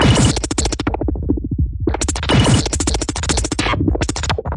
bit 0044 1-Audio-Bunt 5
square-wave, lo-fi, breakcore, digital, electronic, lesson, drill, DNB, harsh, bunt, synthesized, noise, rekombinacje, tracker, VST, glitch, synth-percussion, NoizDumpster